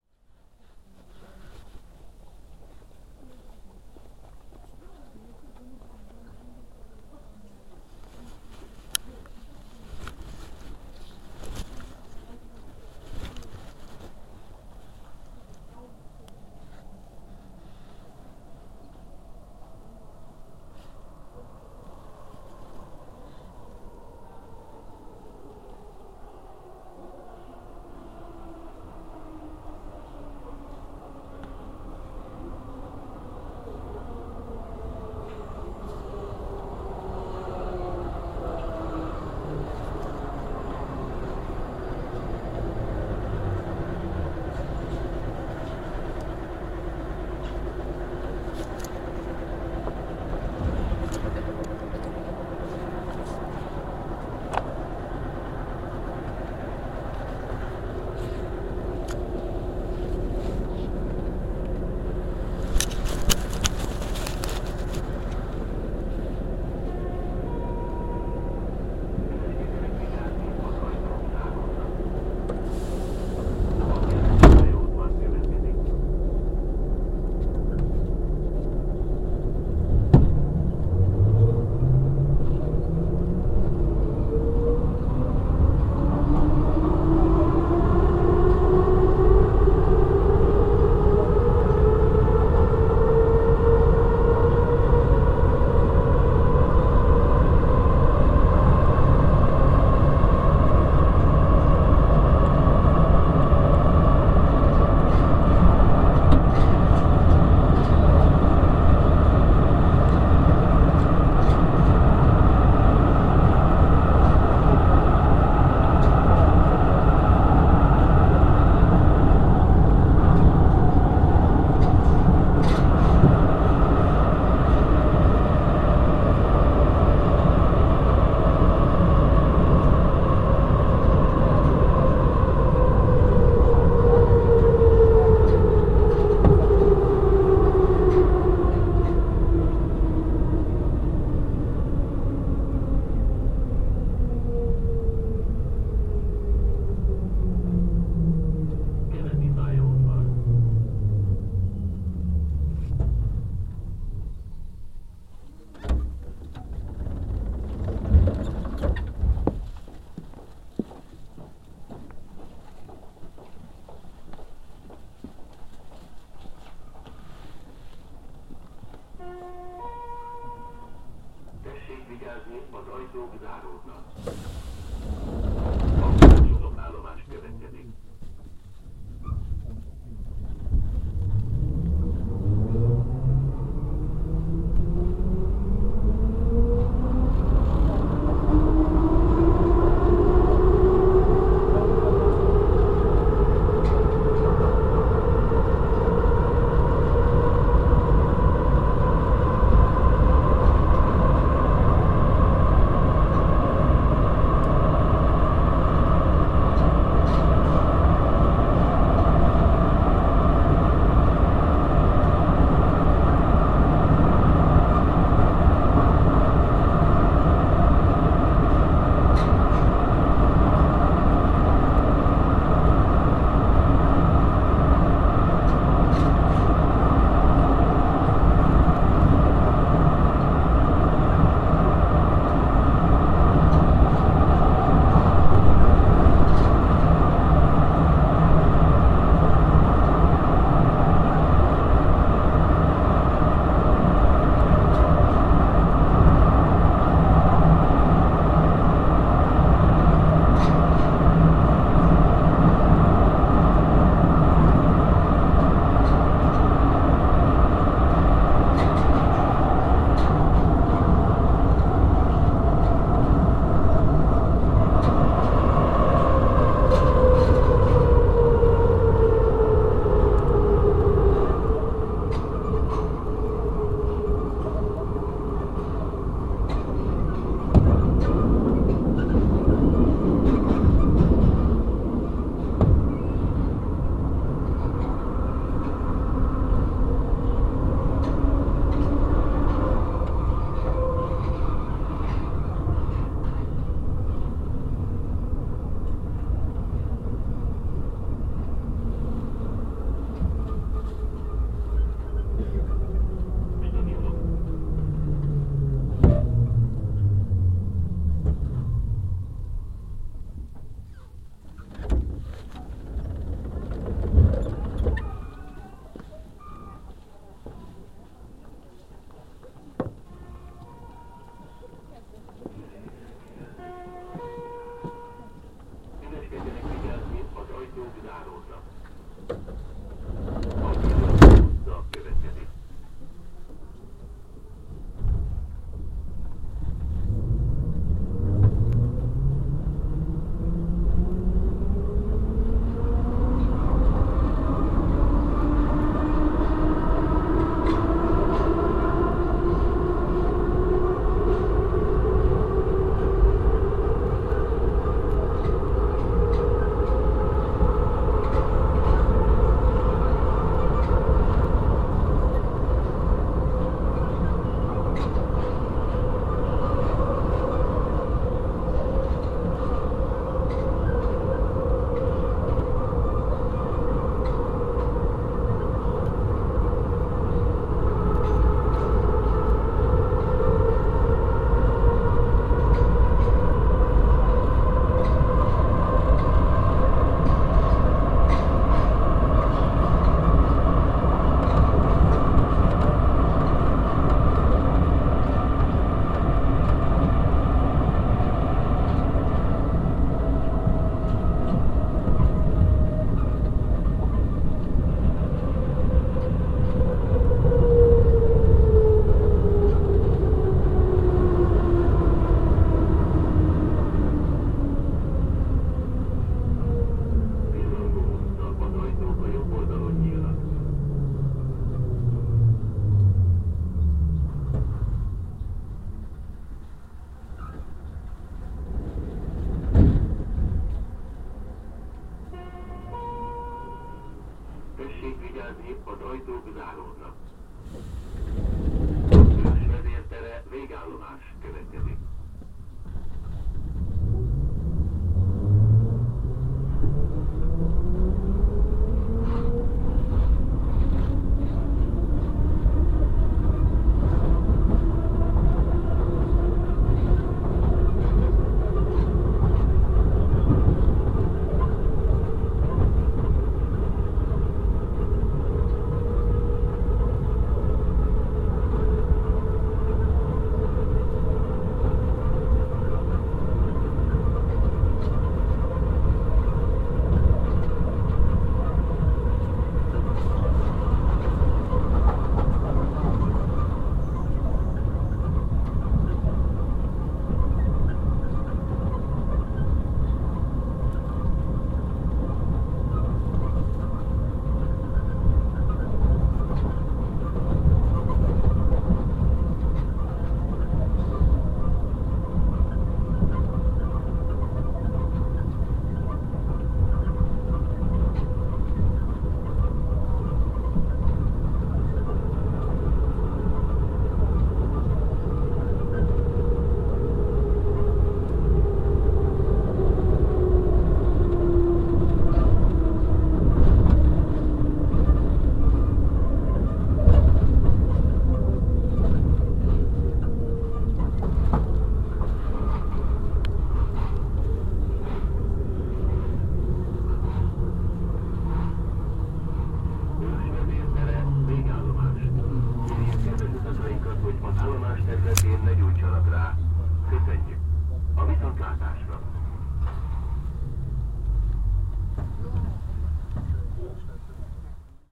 Budapest Metro Line 2. The subway cars recorded in higher quality by MP3 player.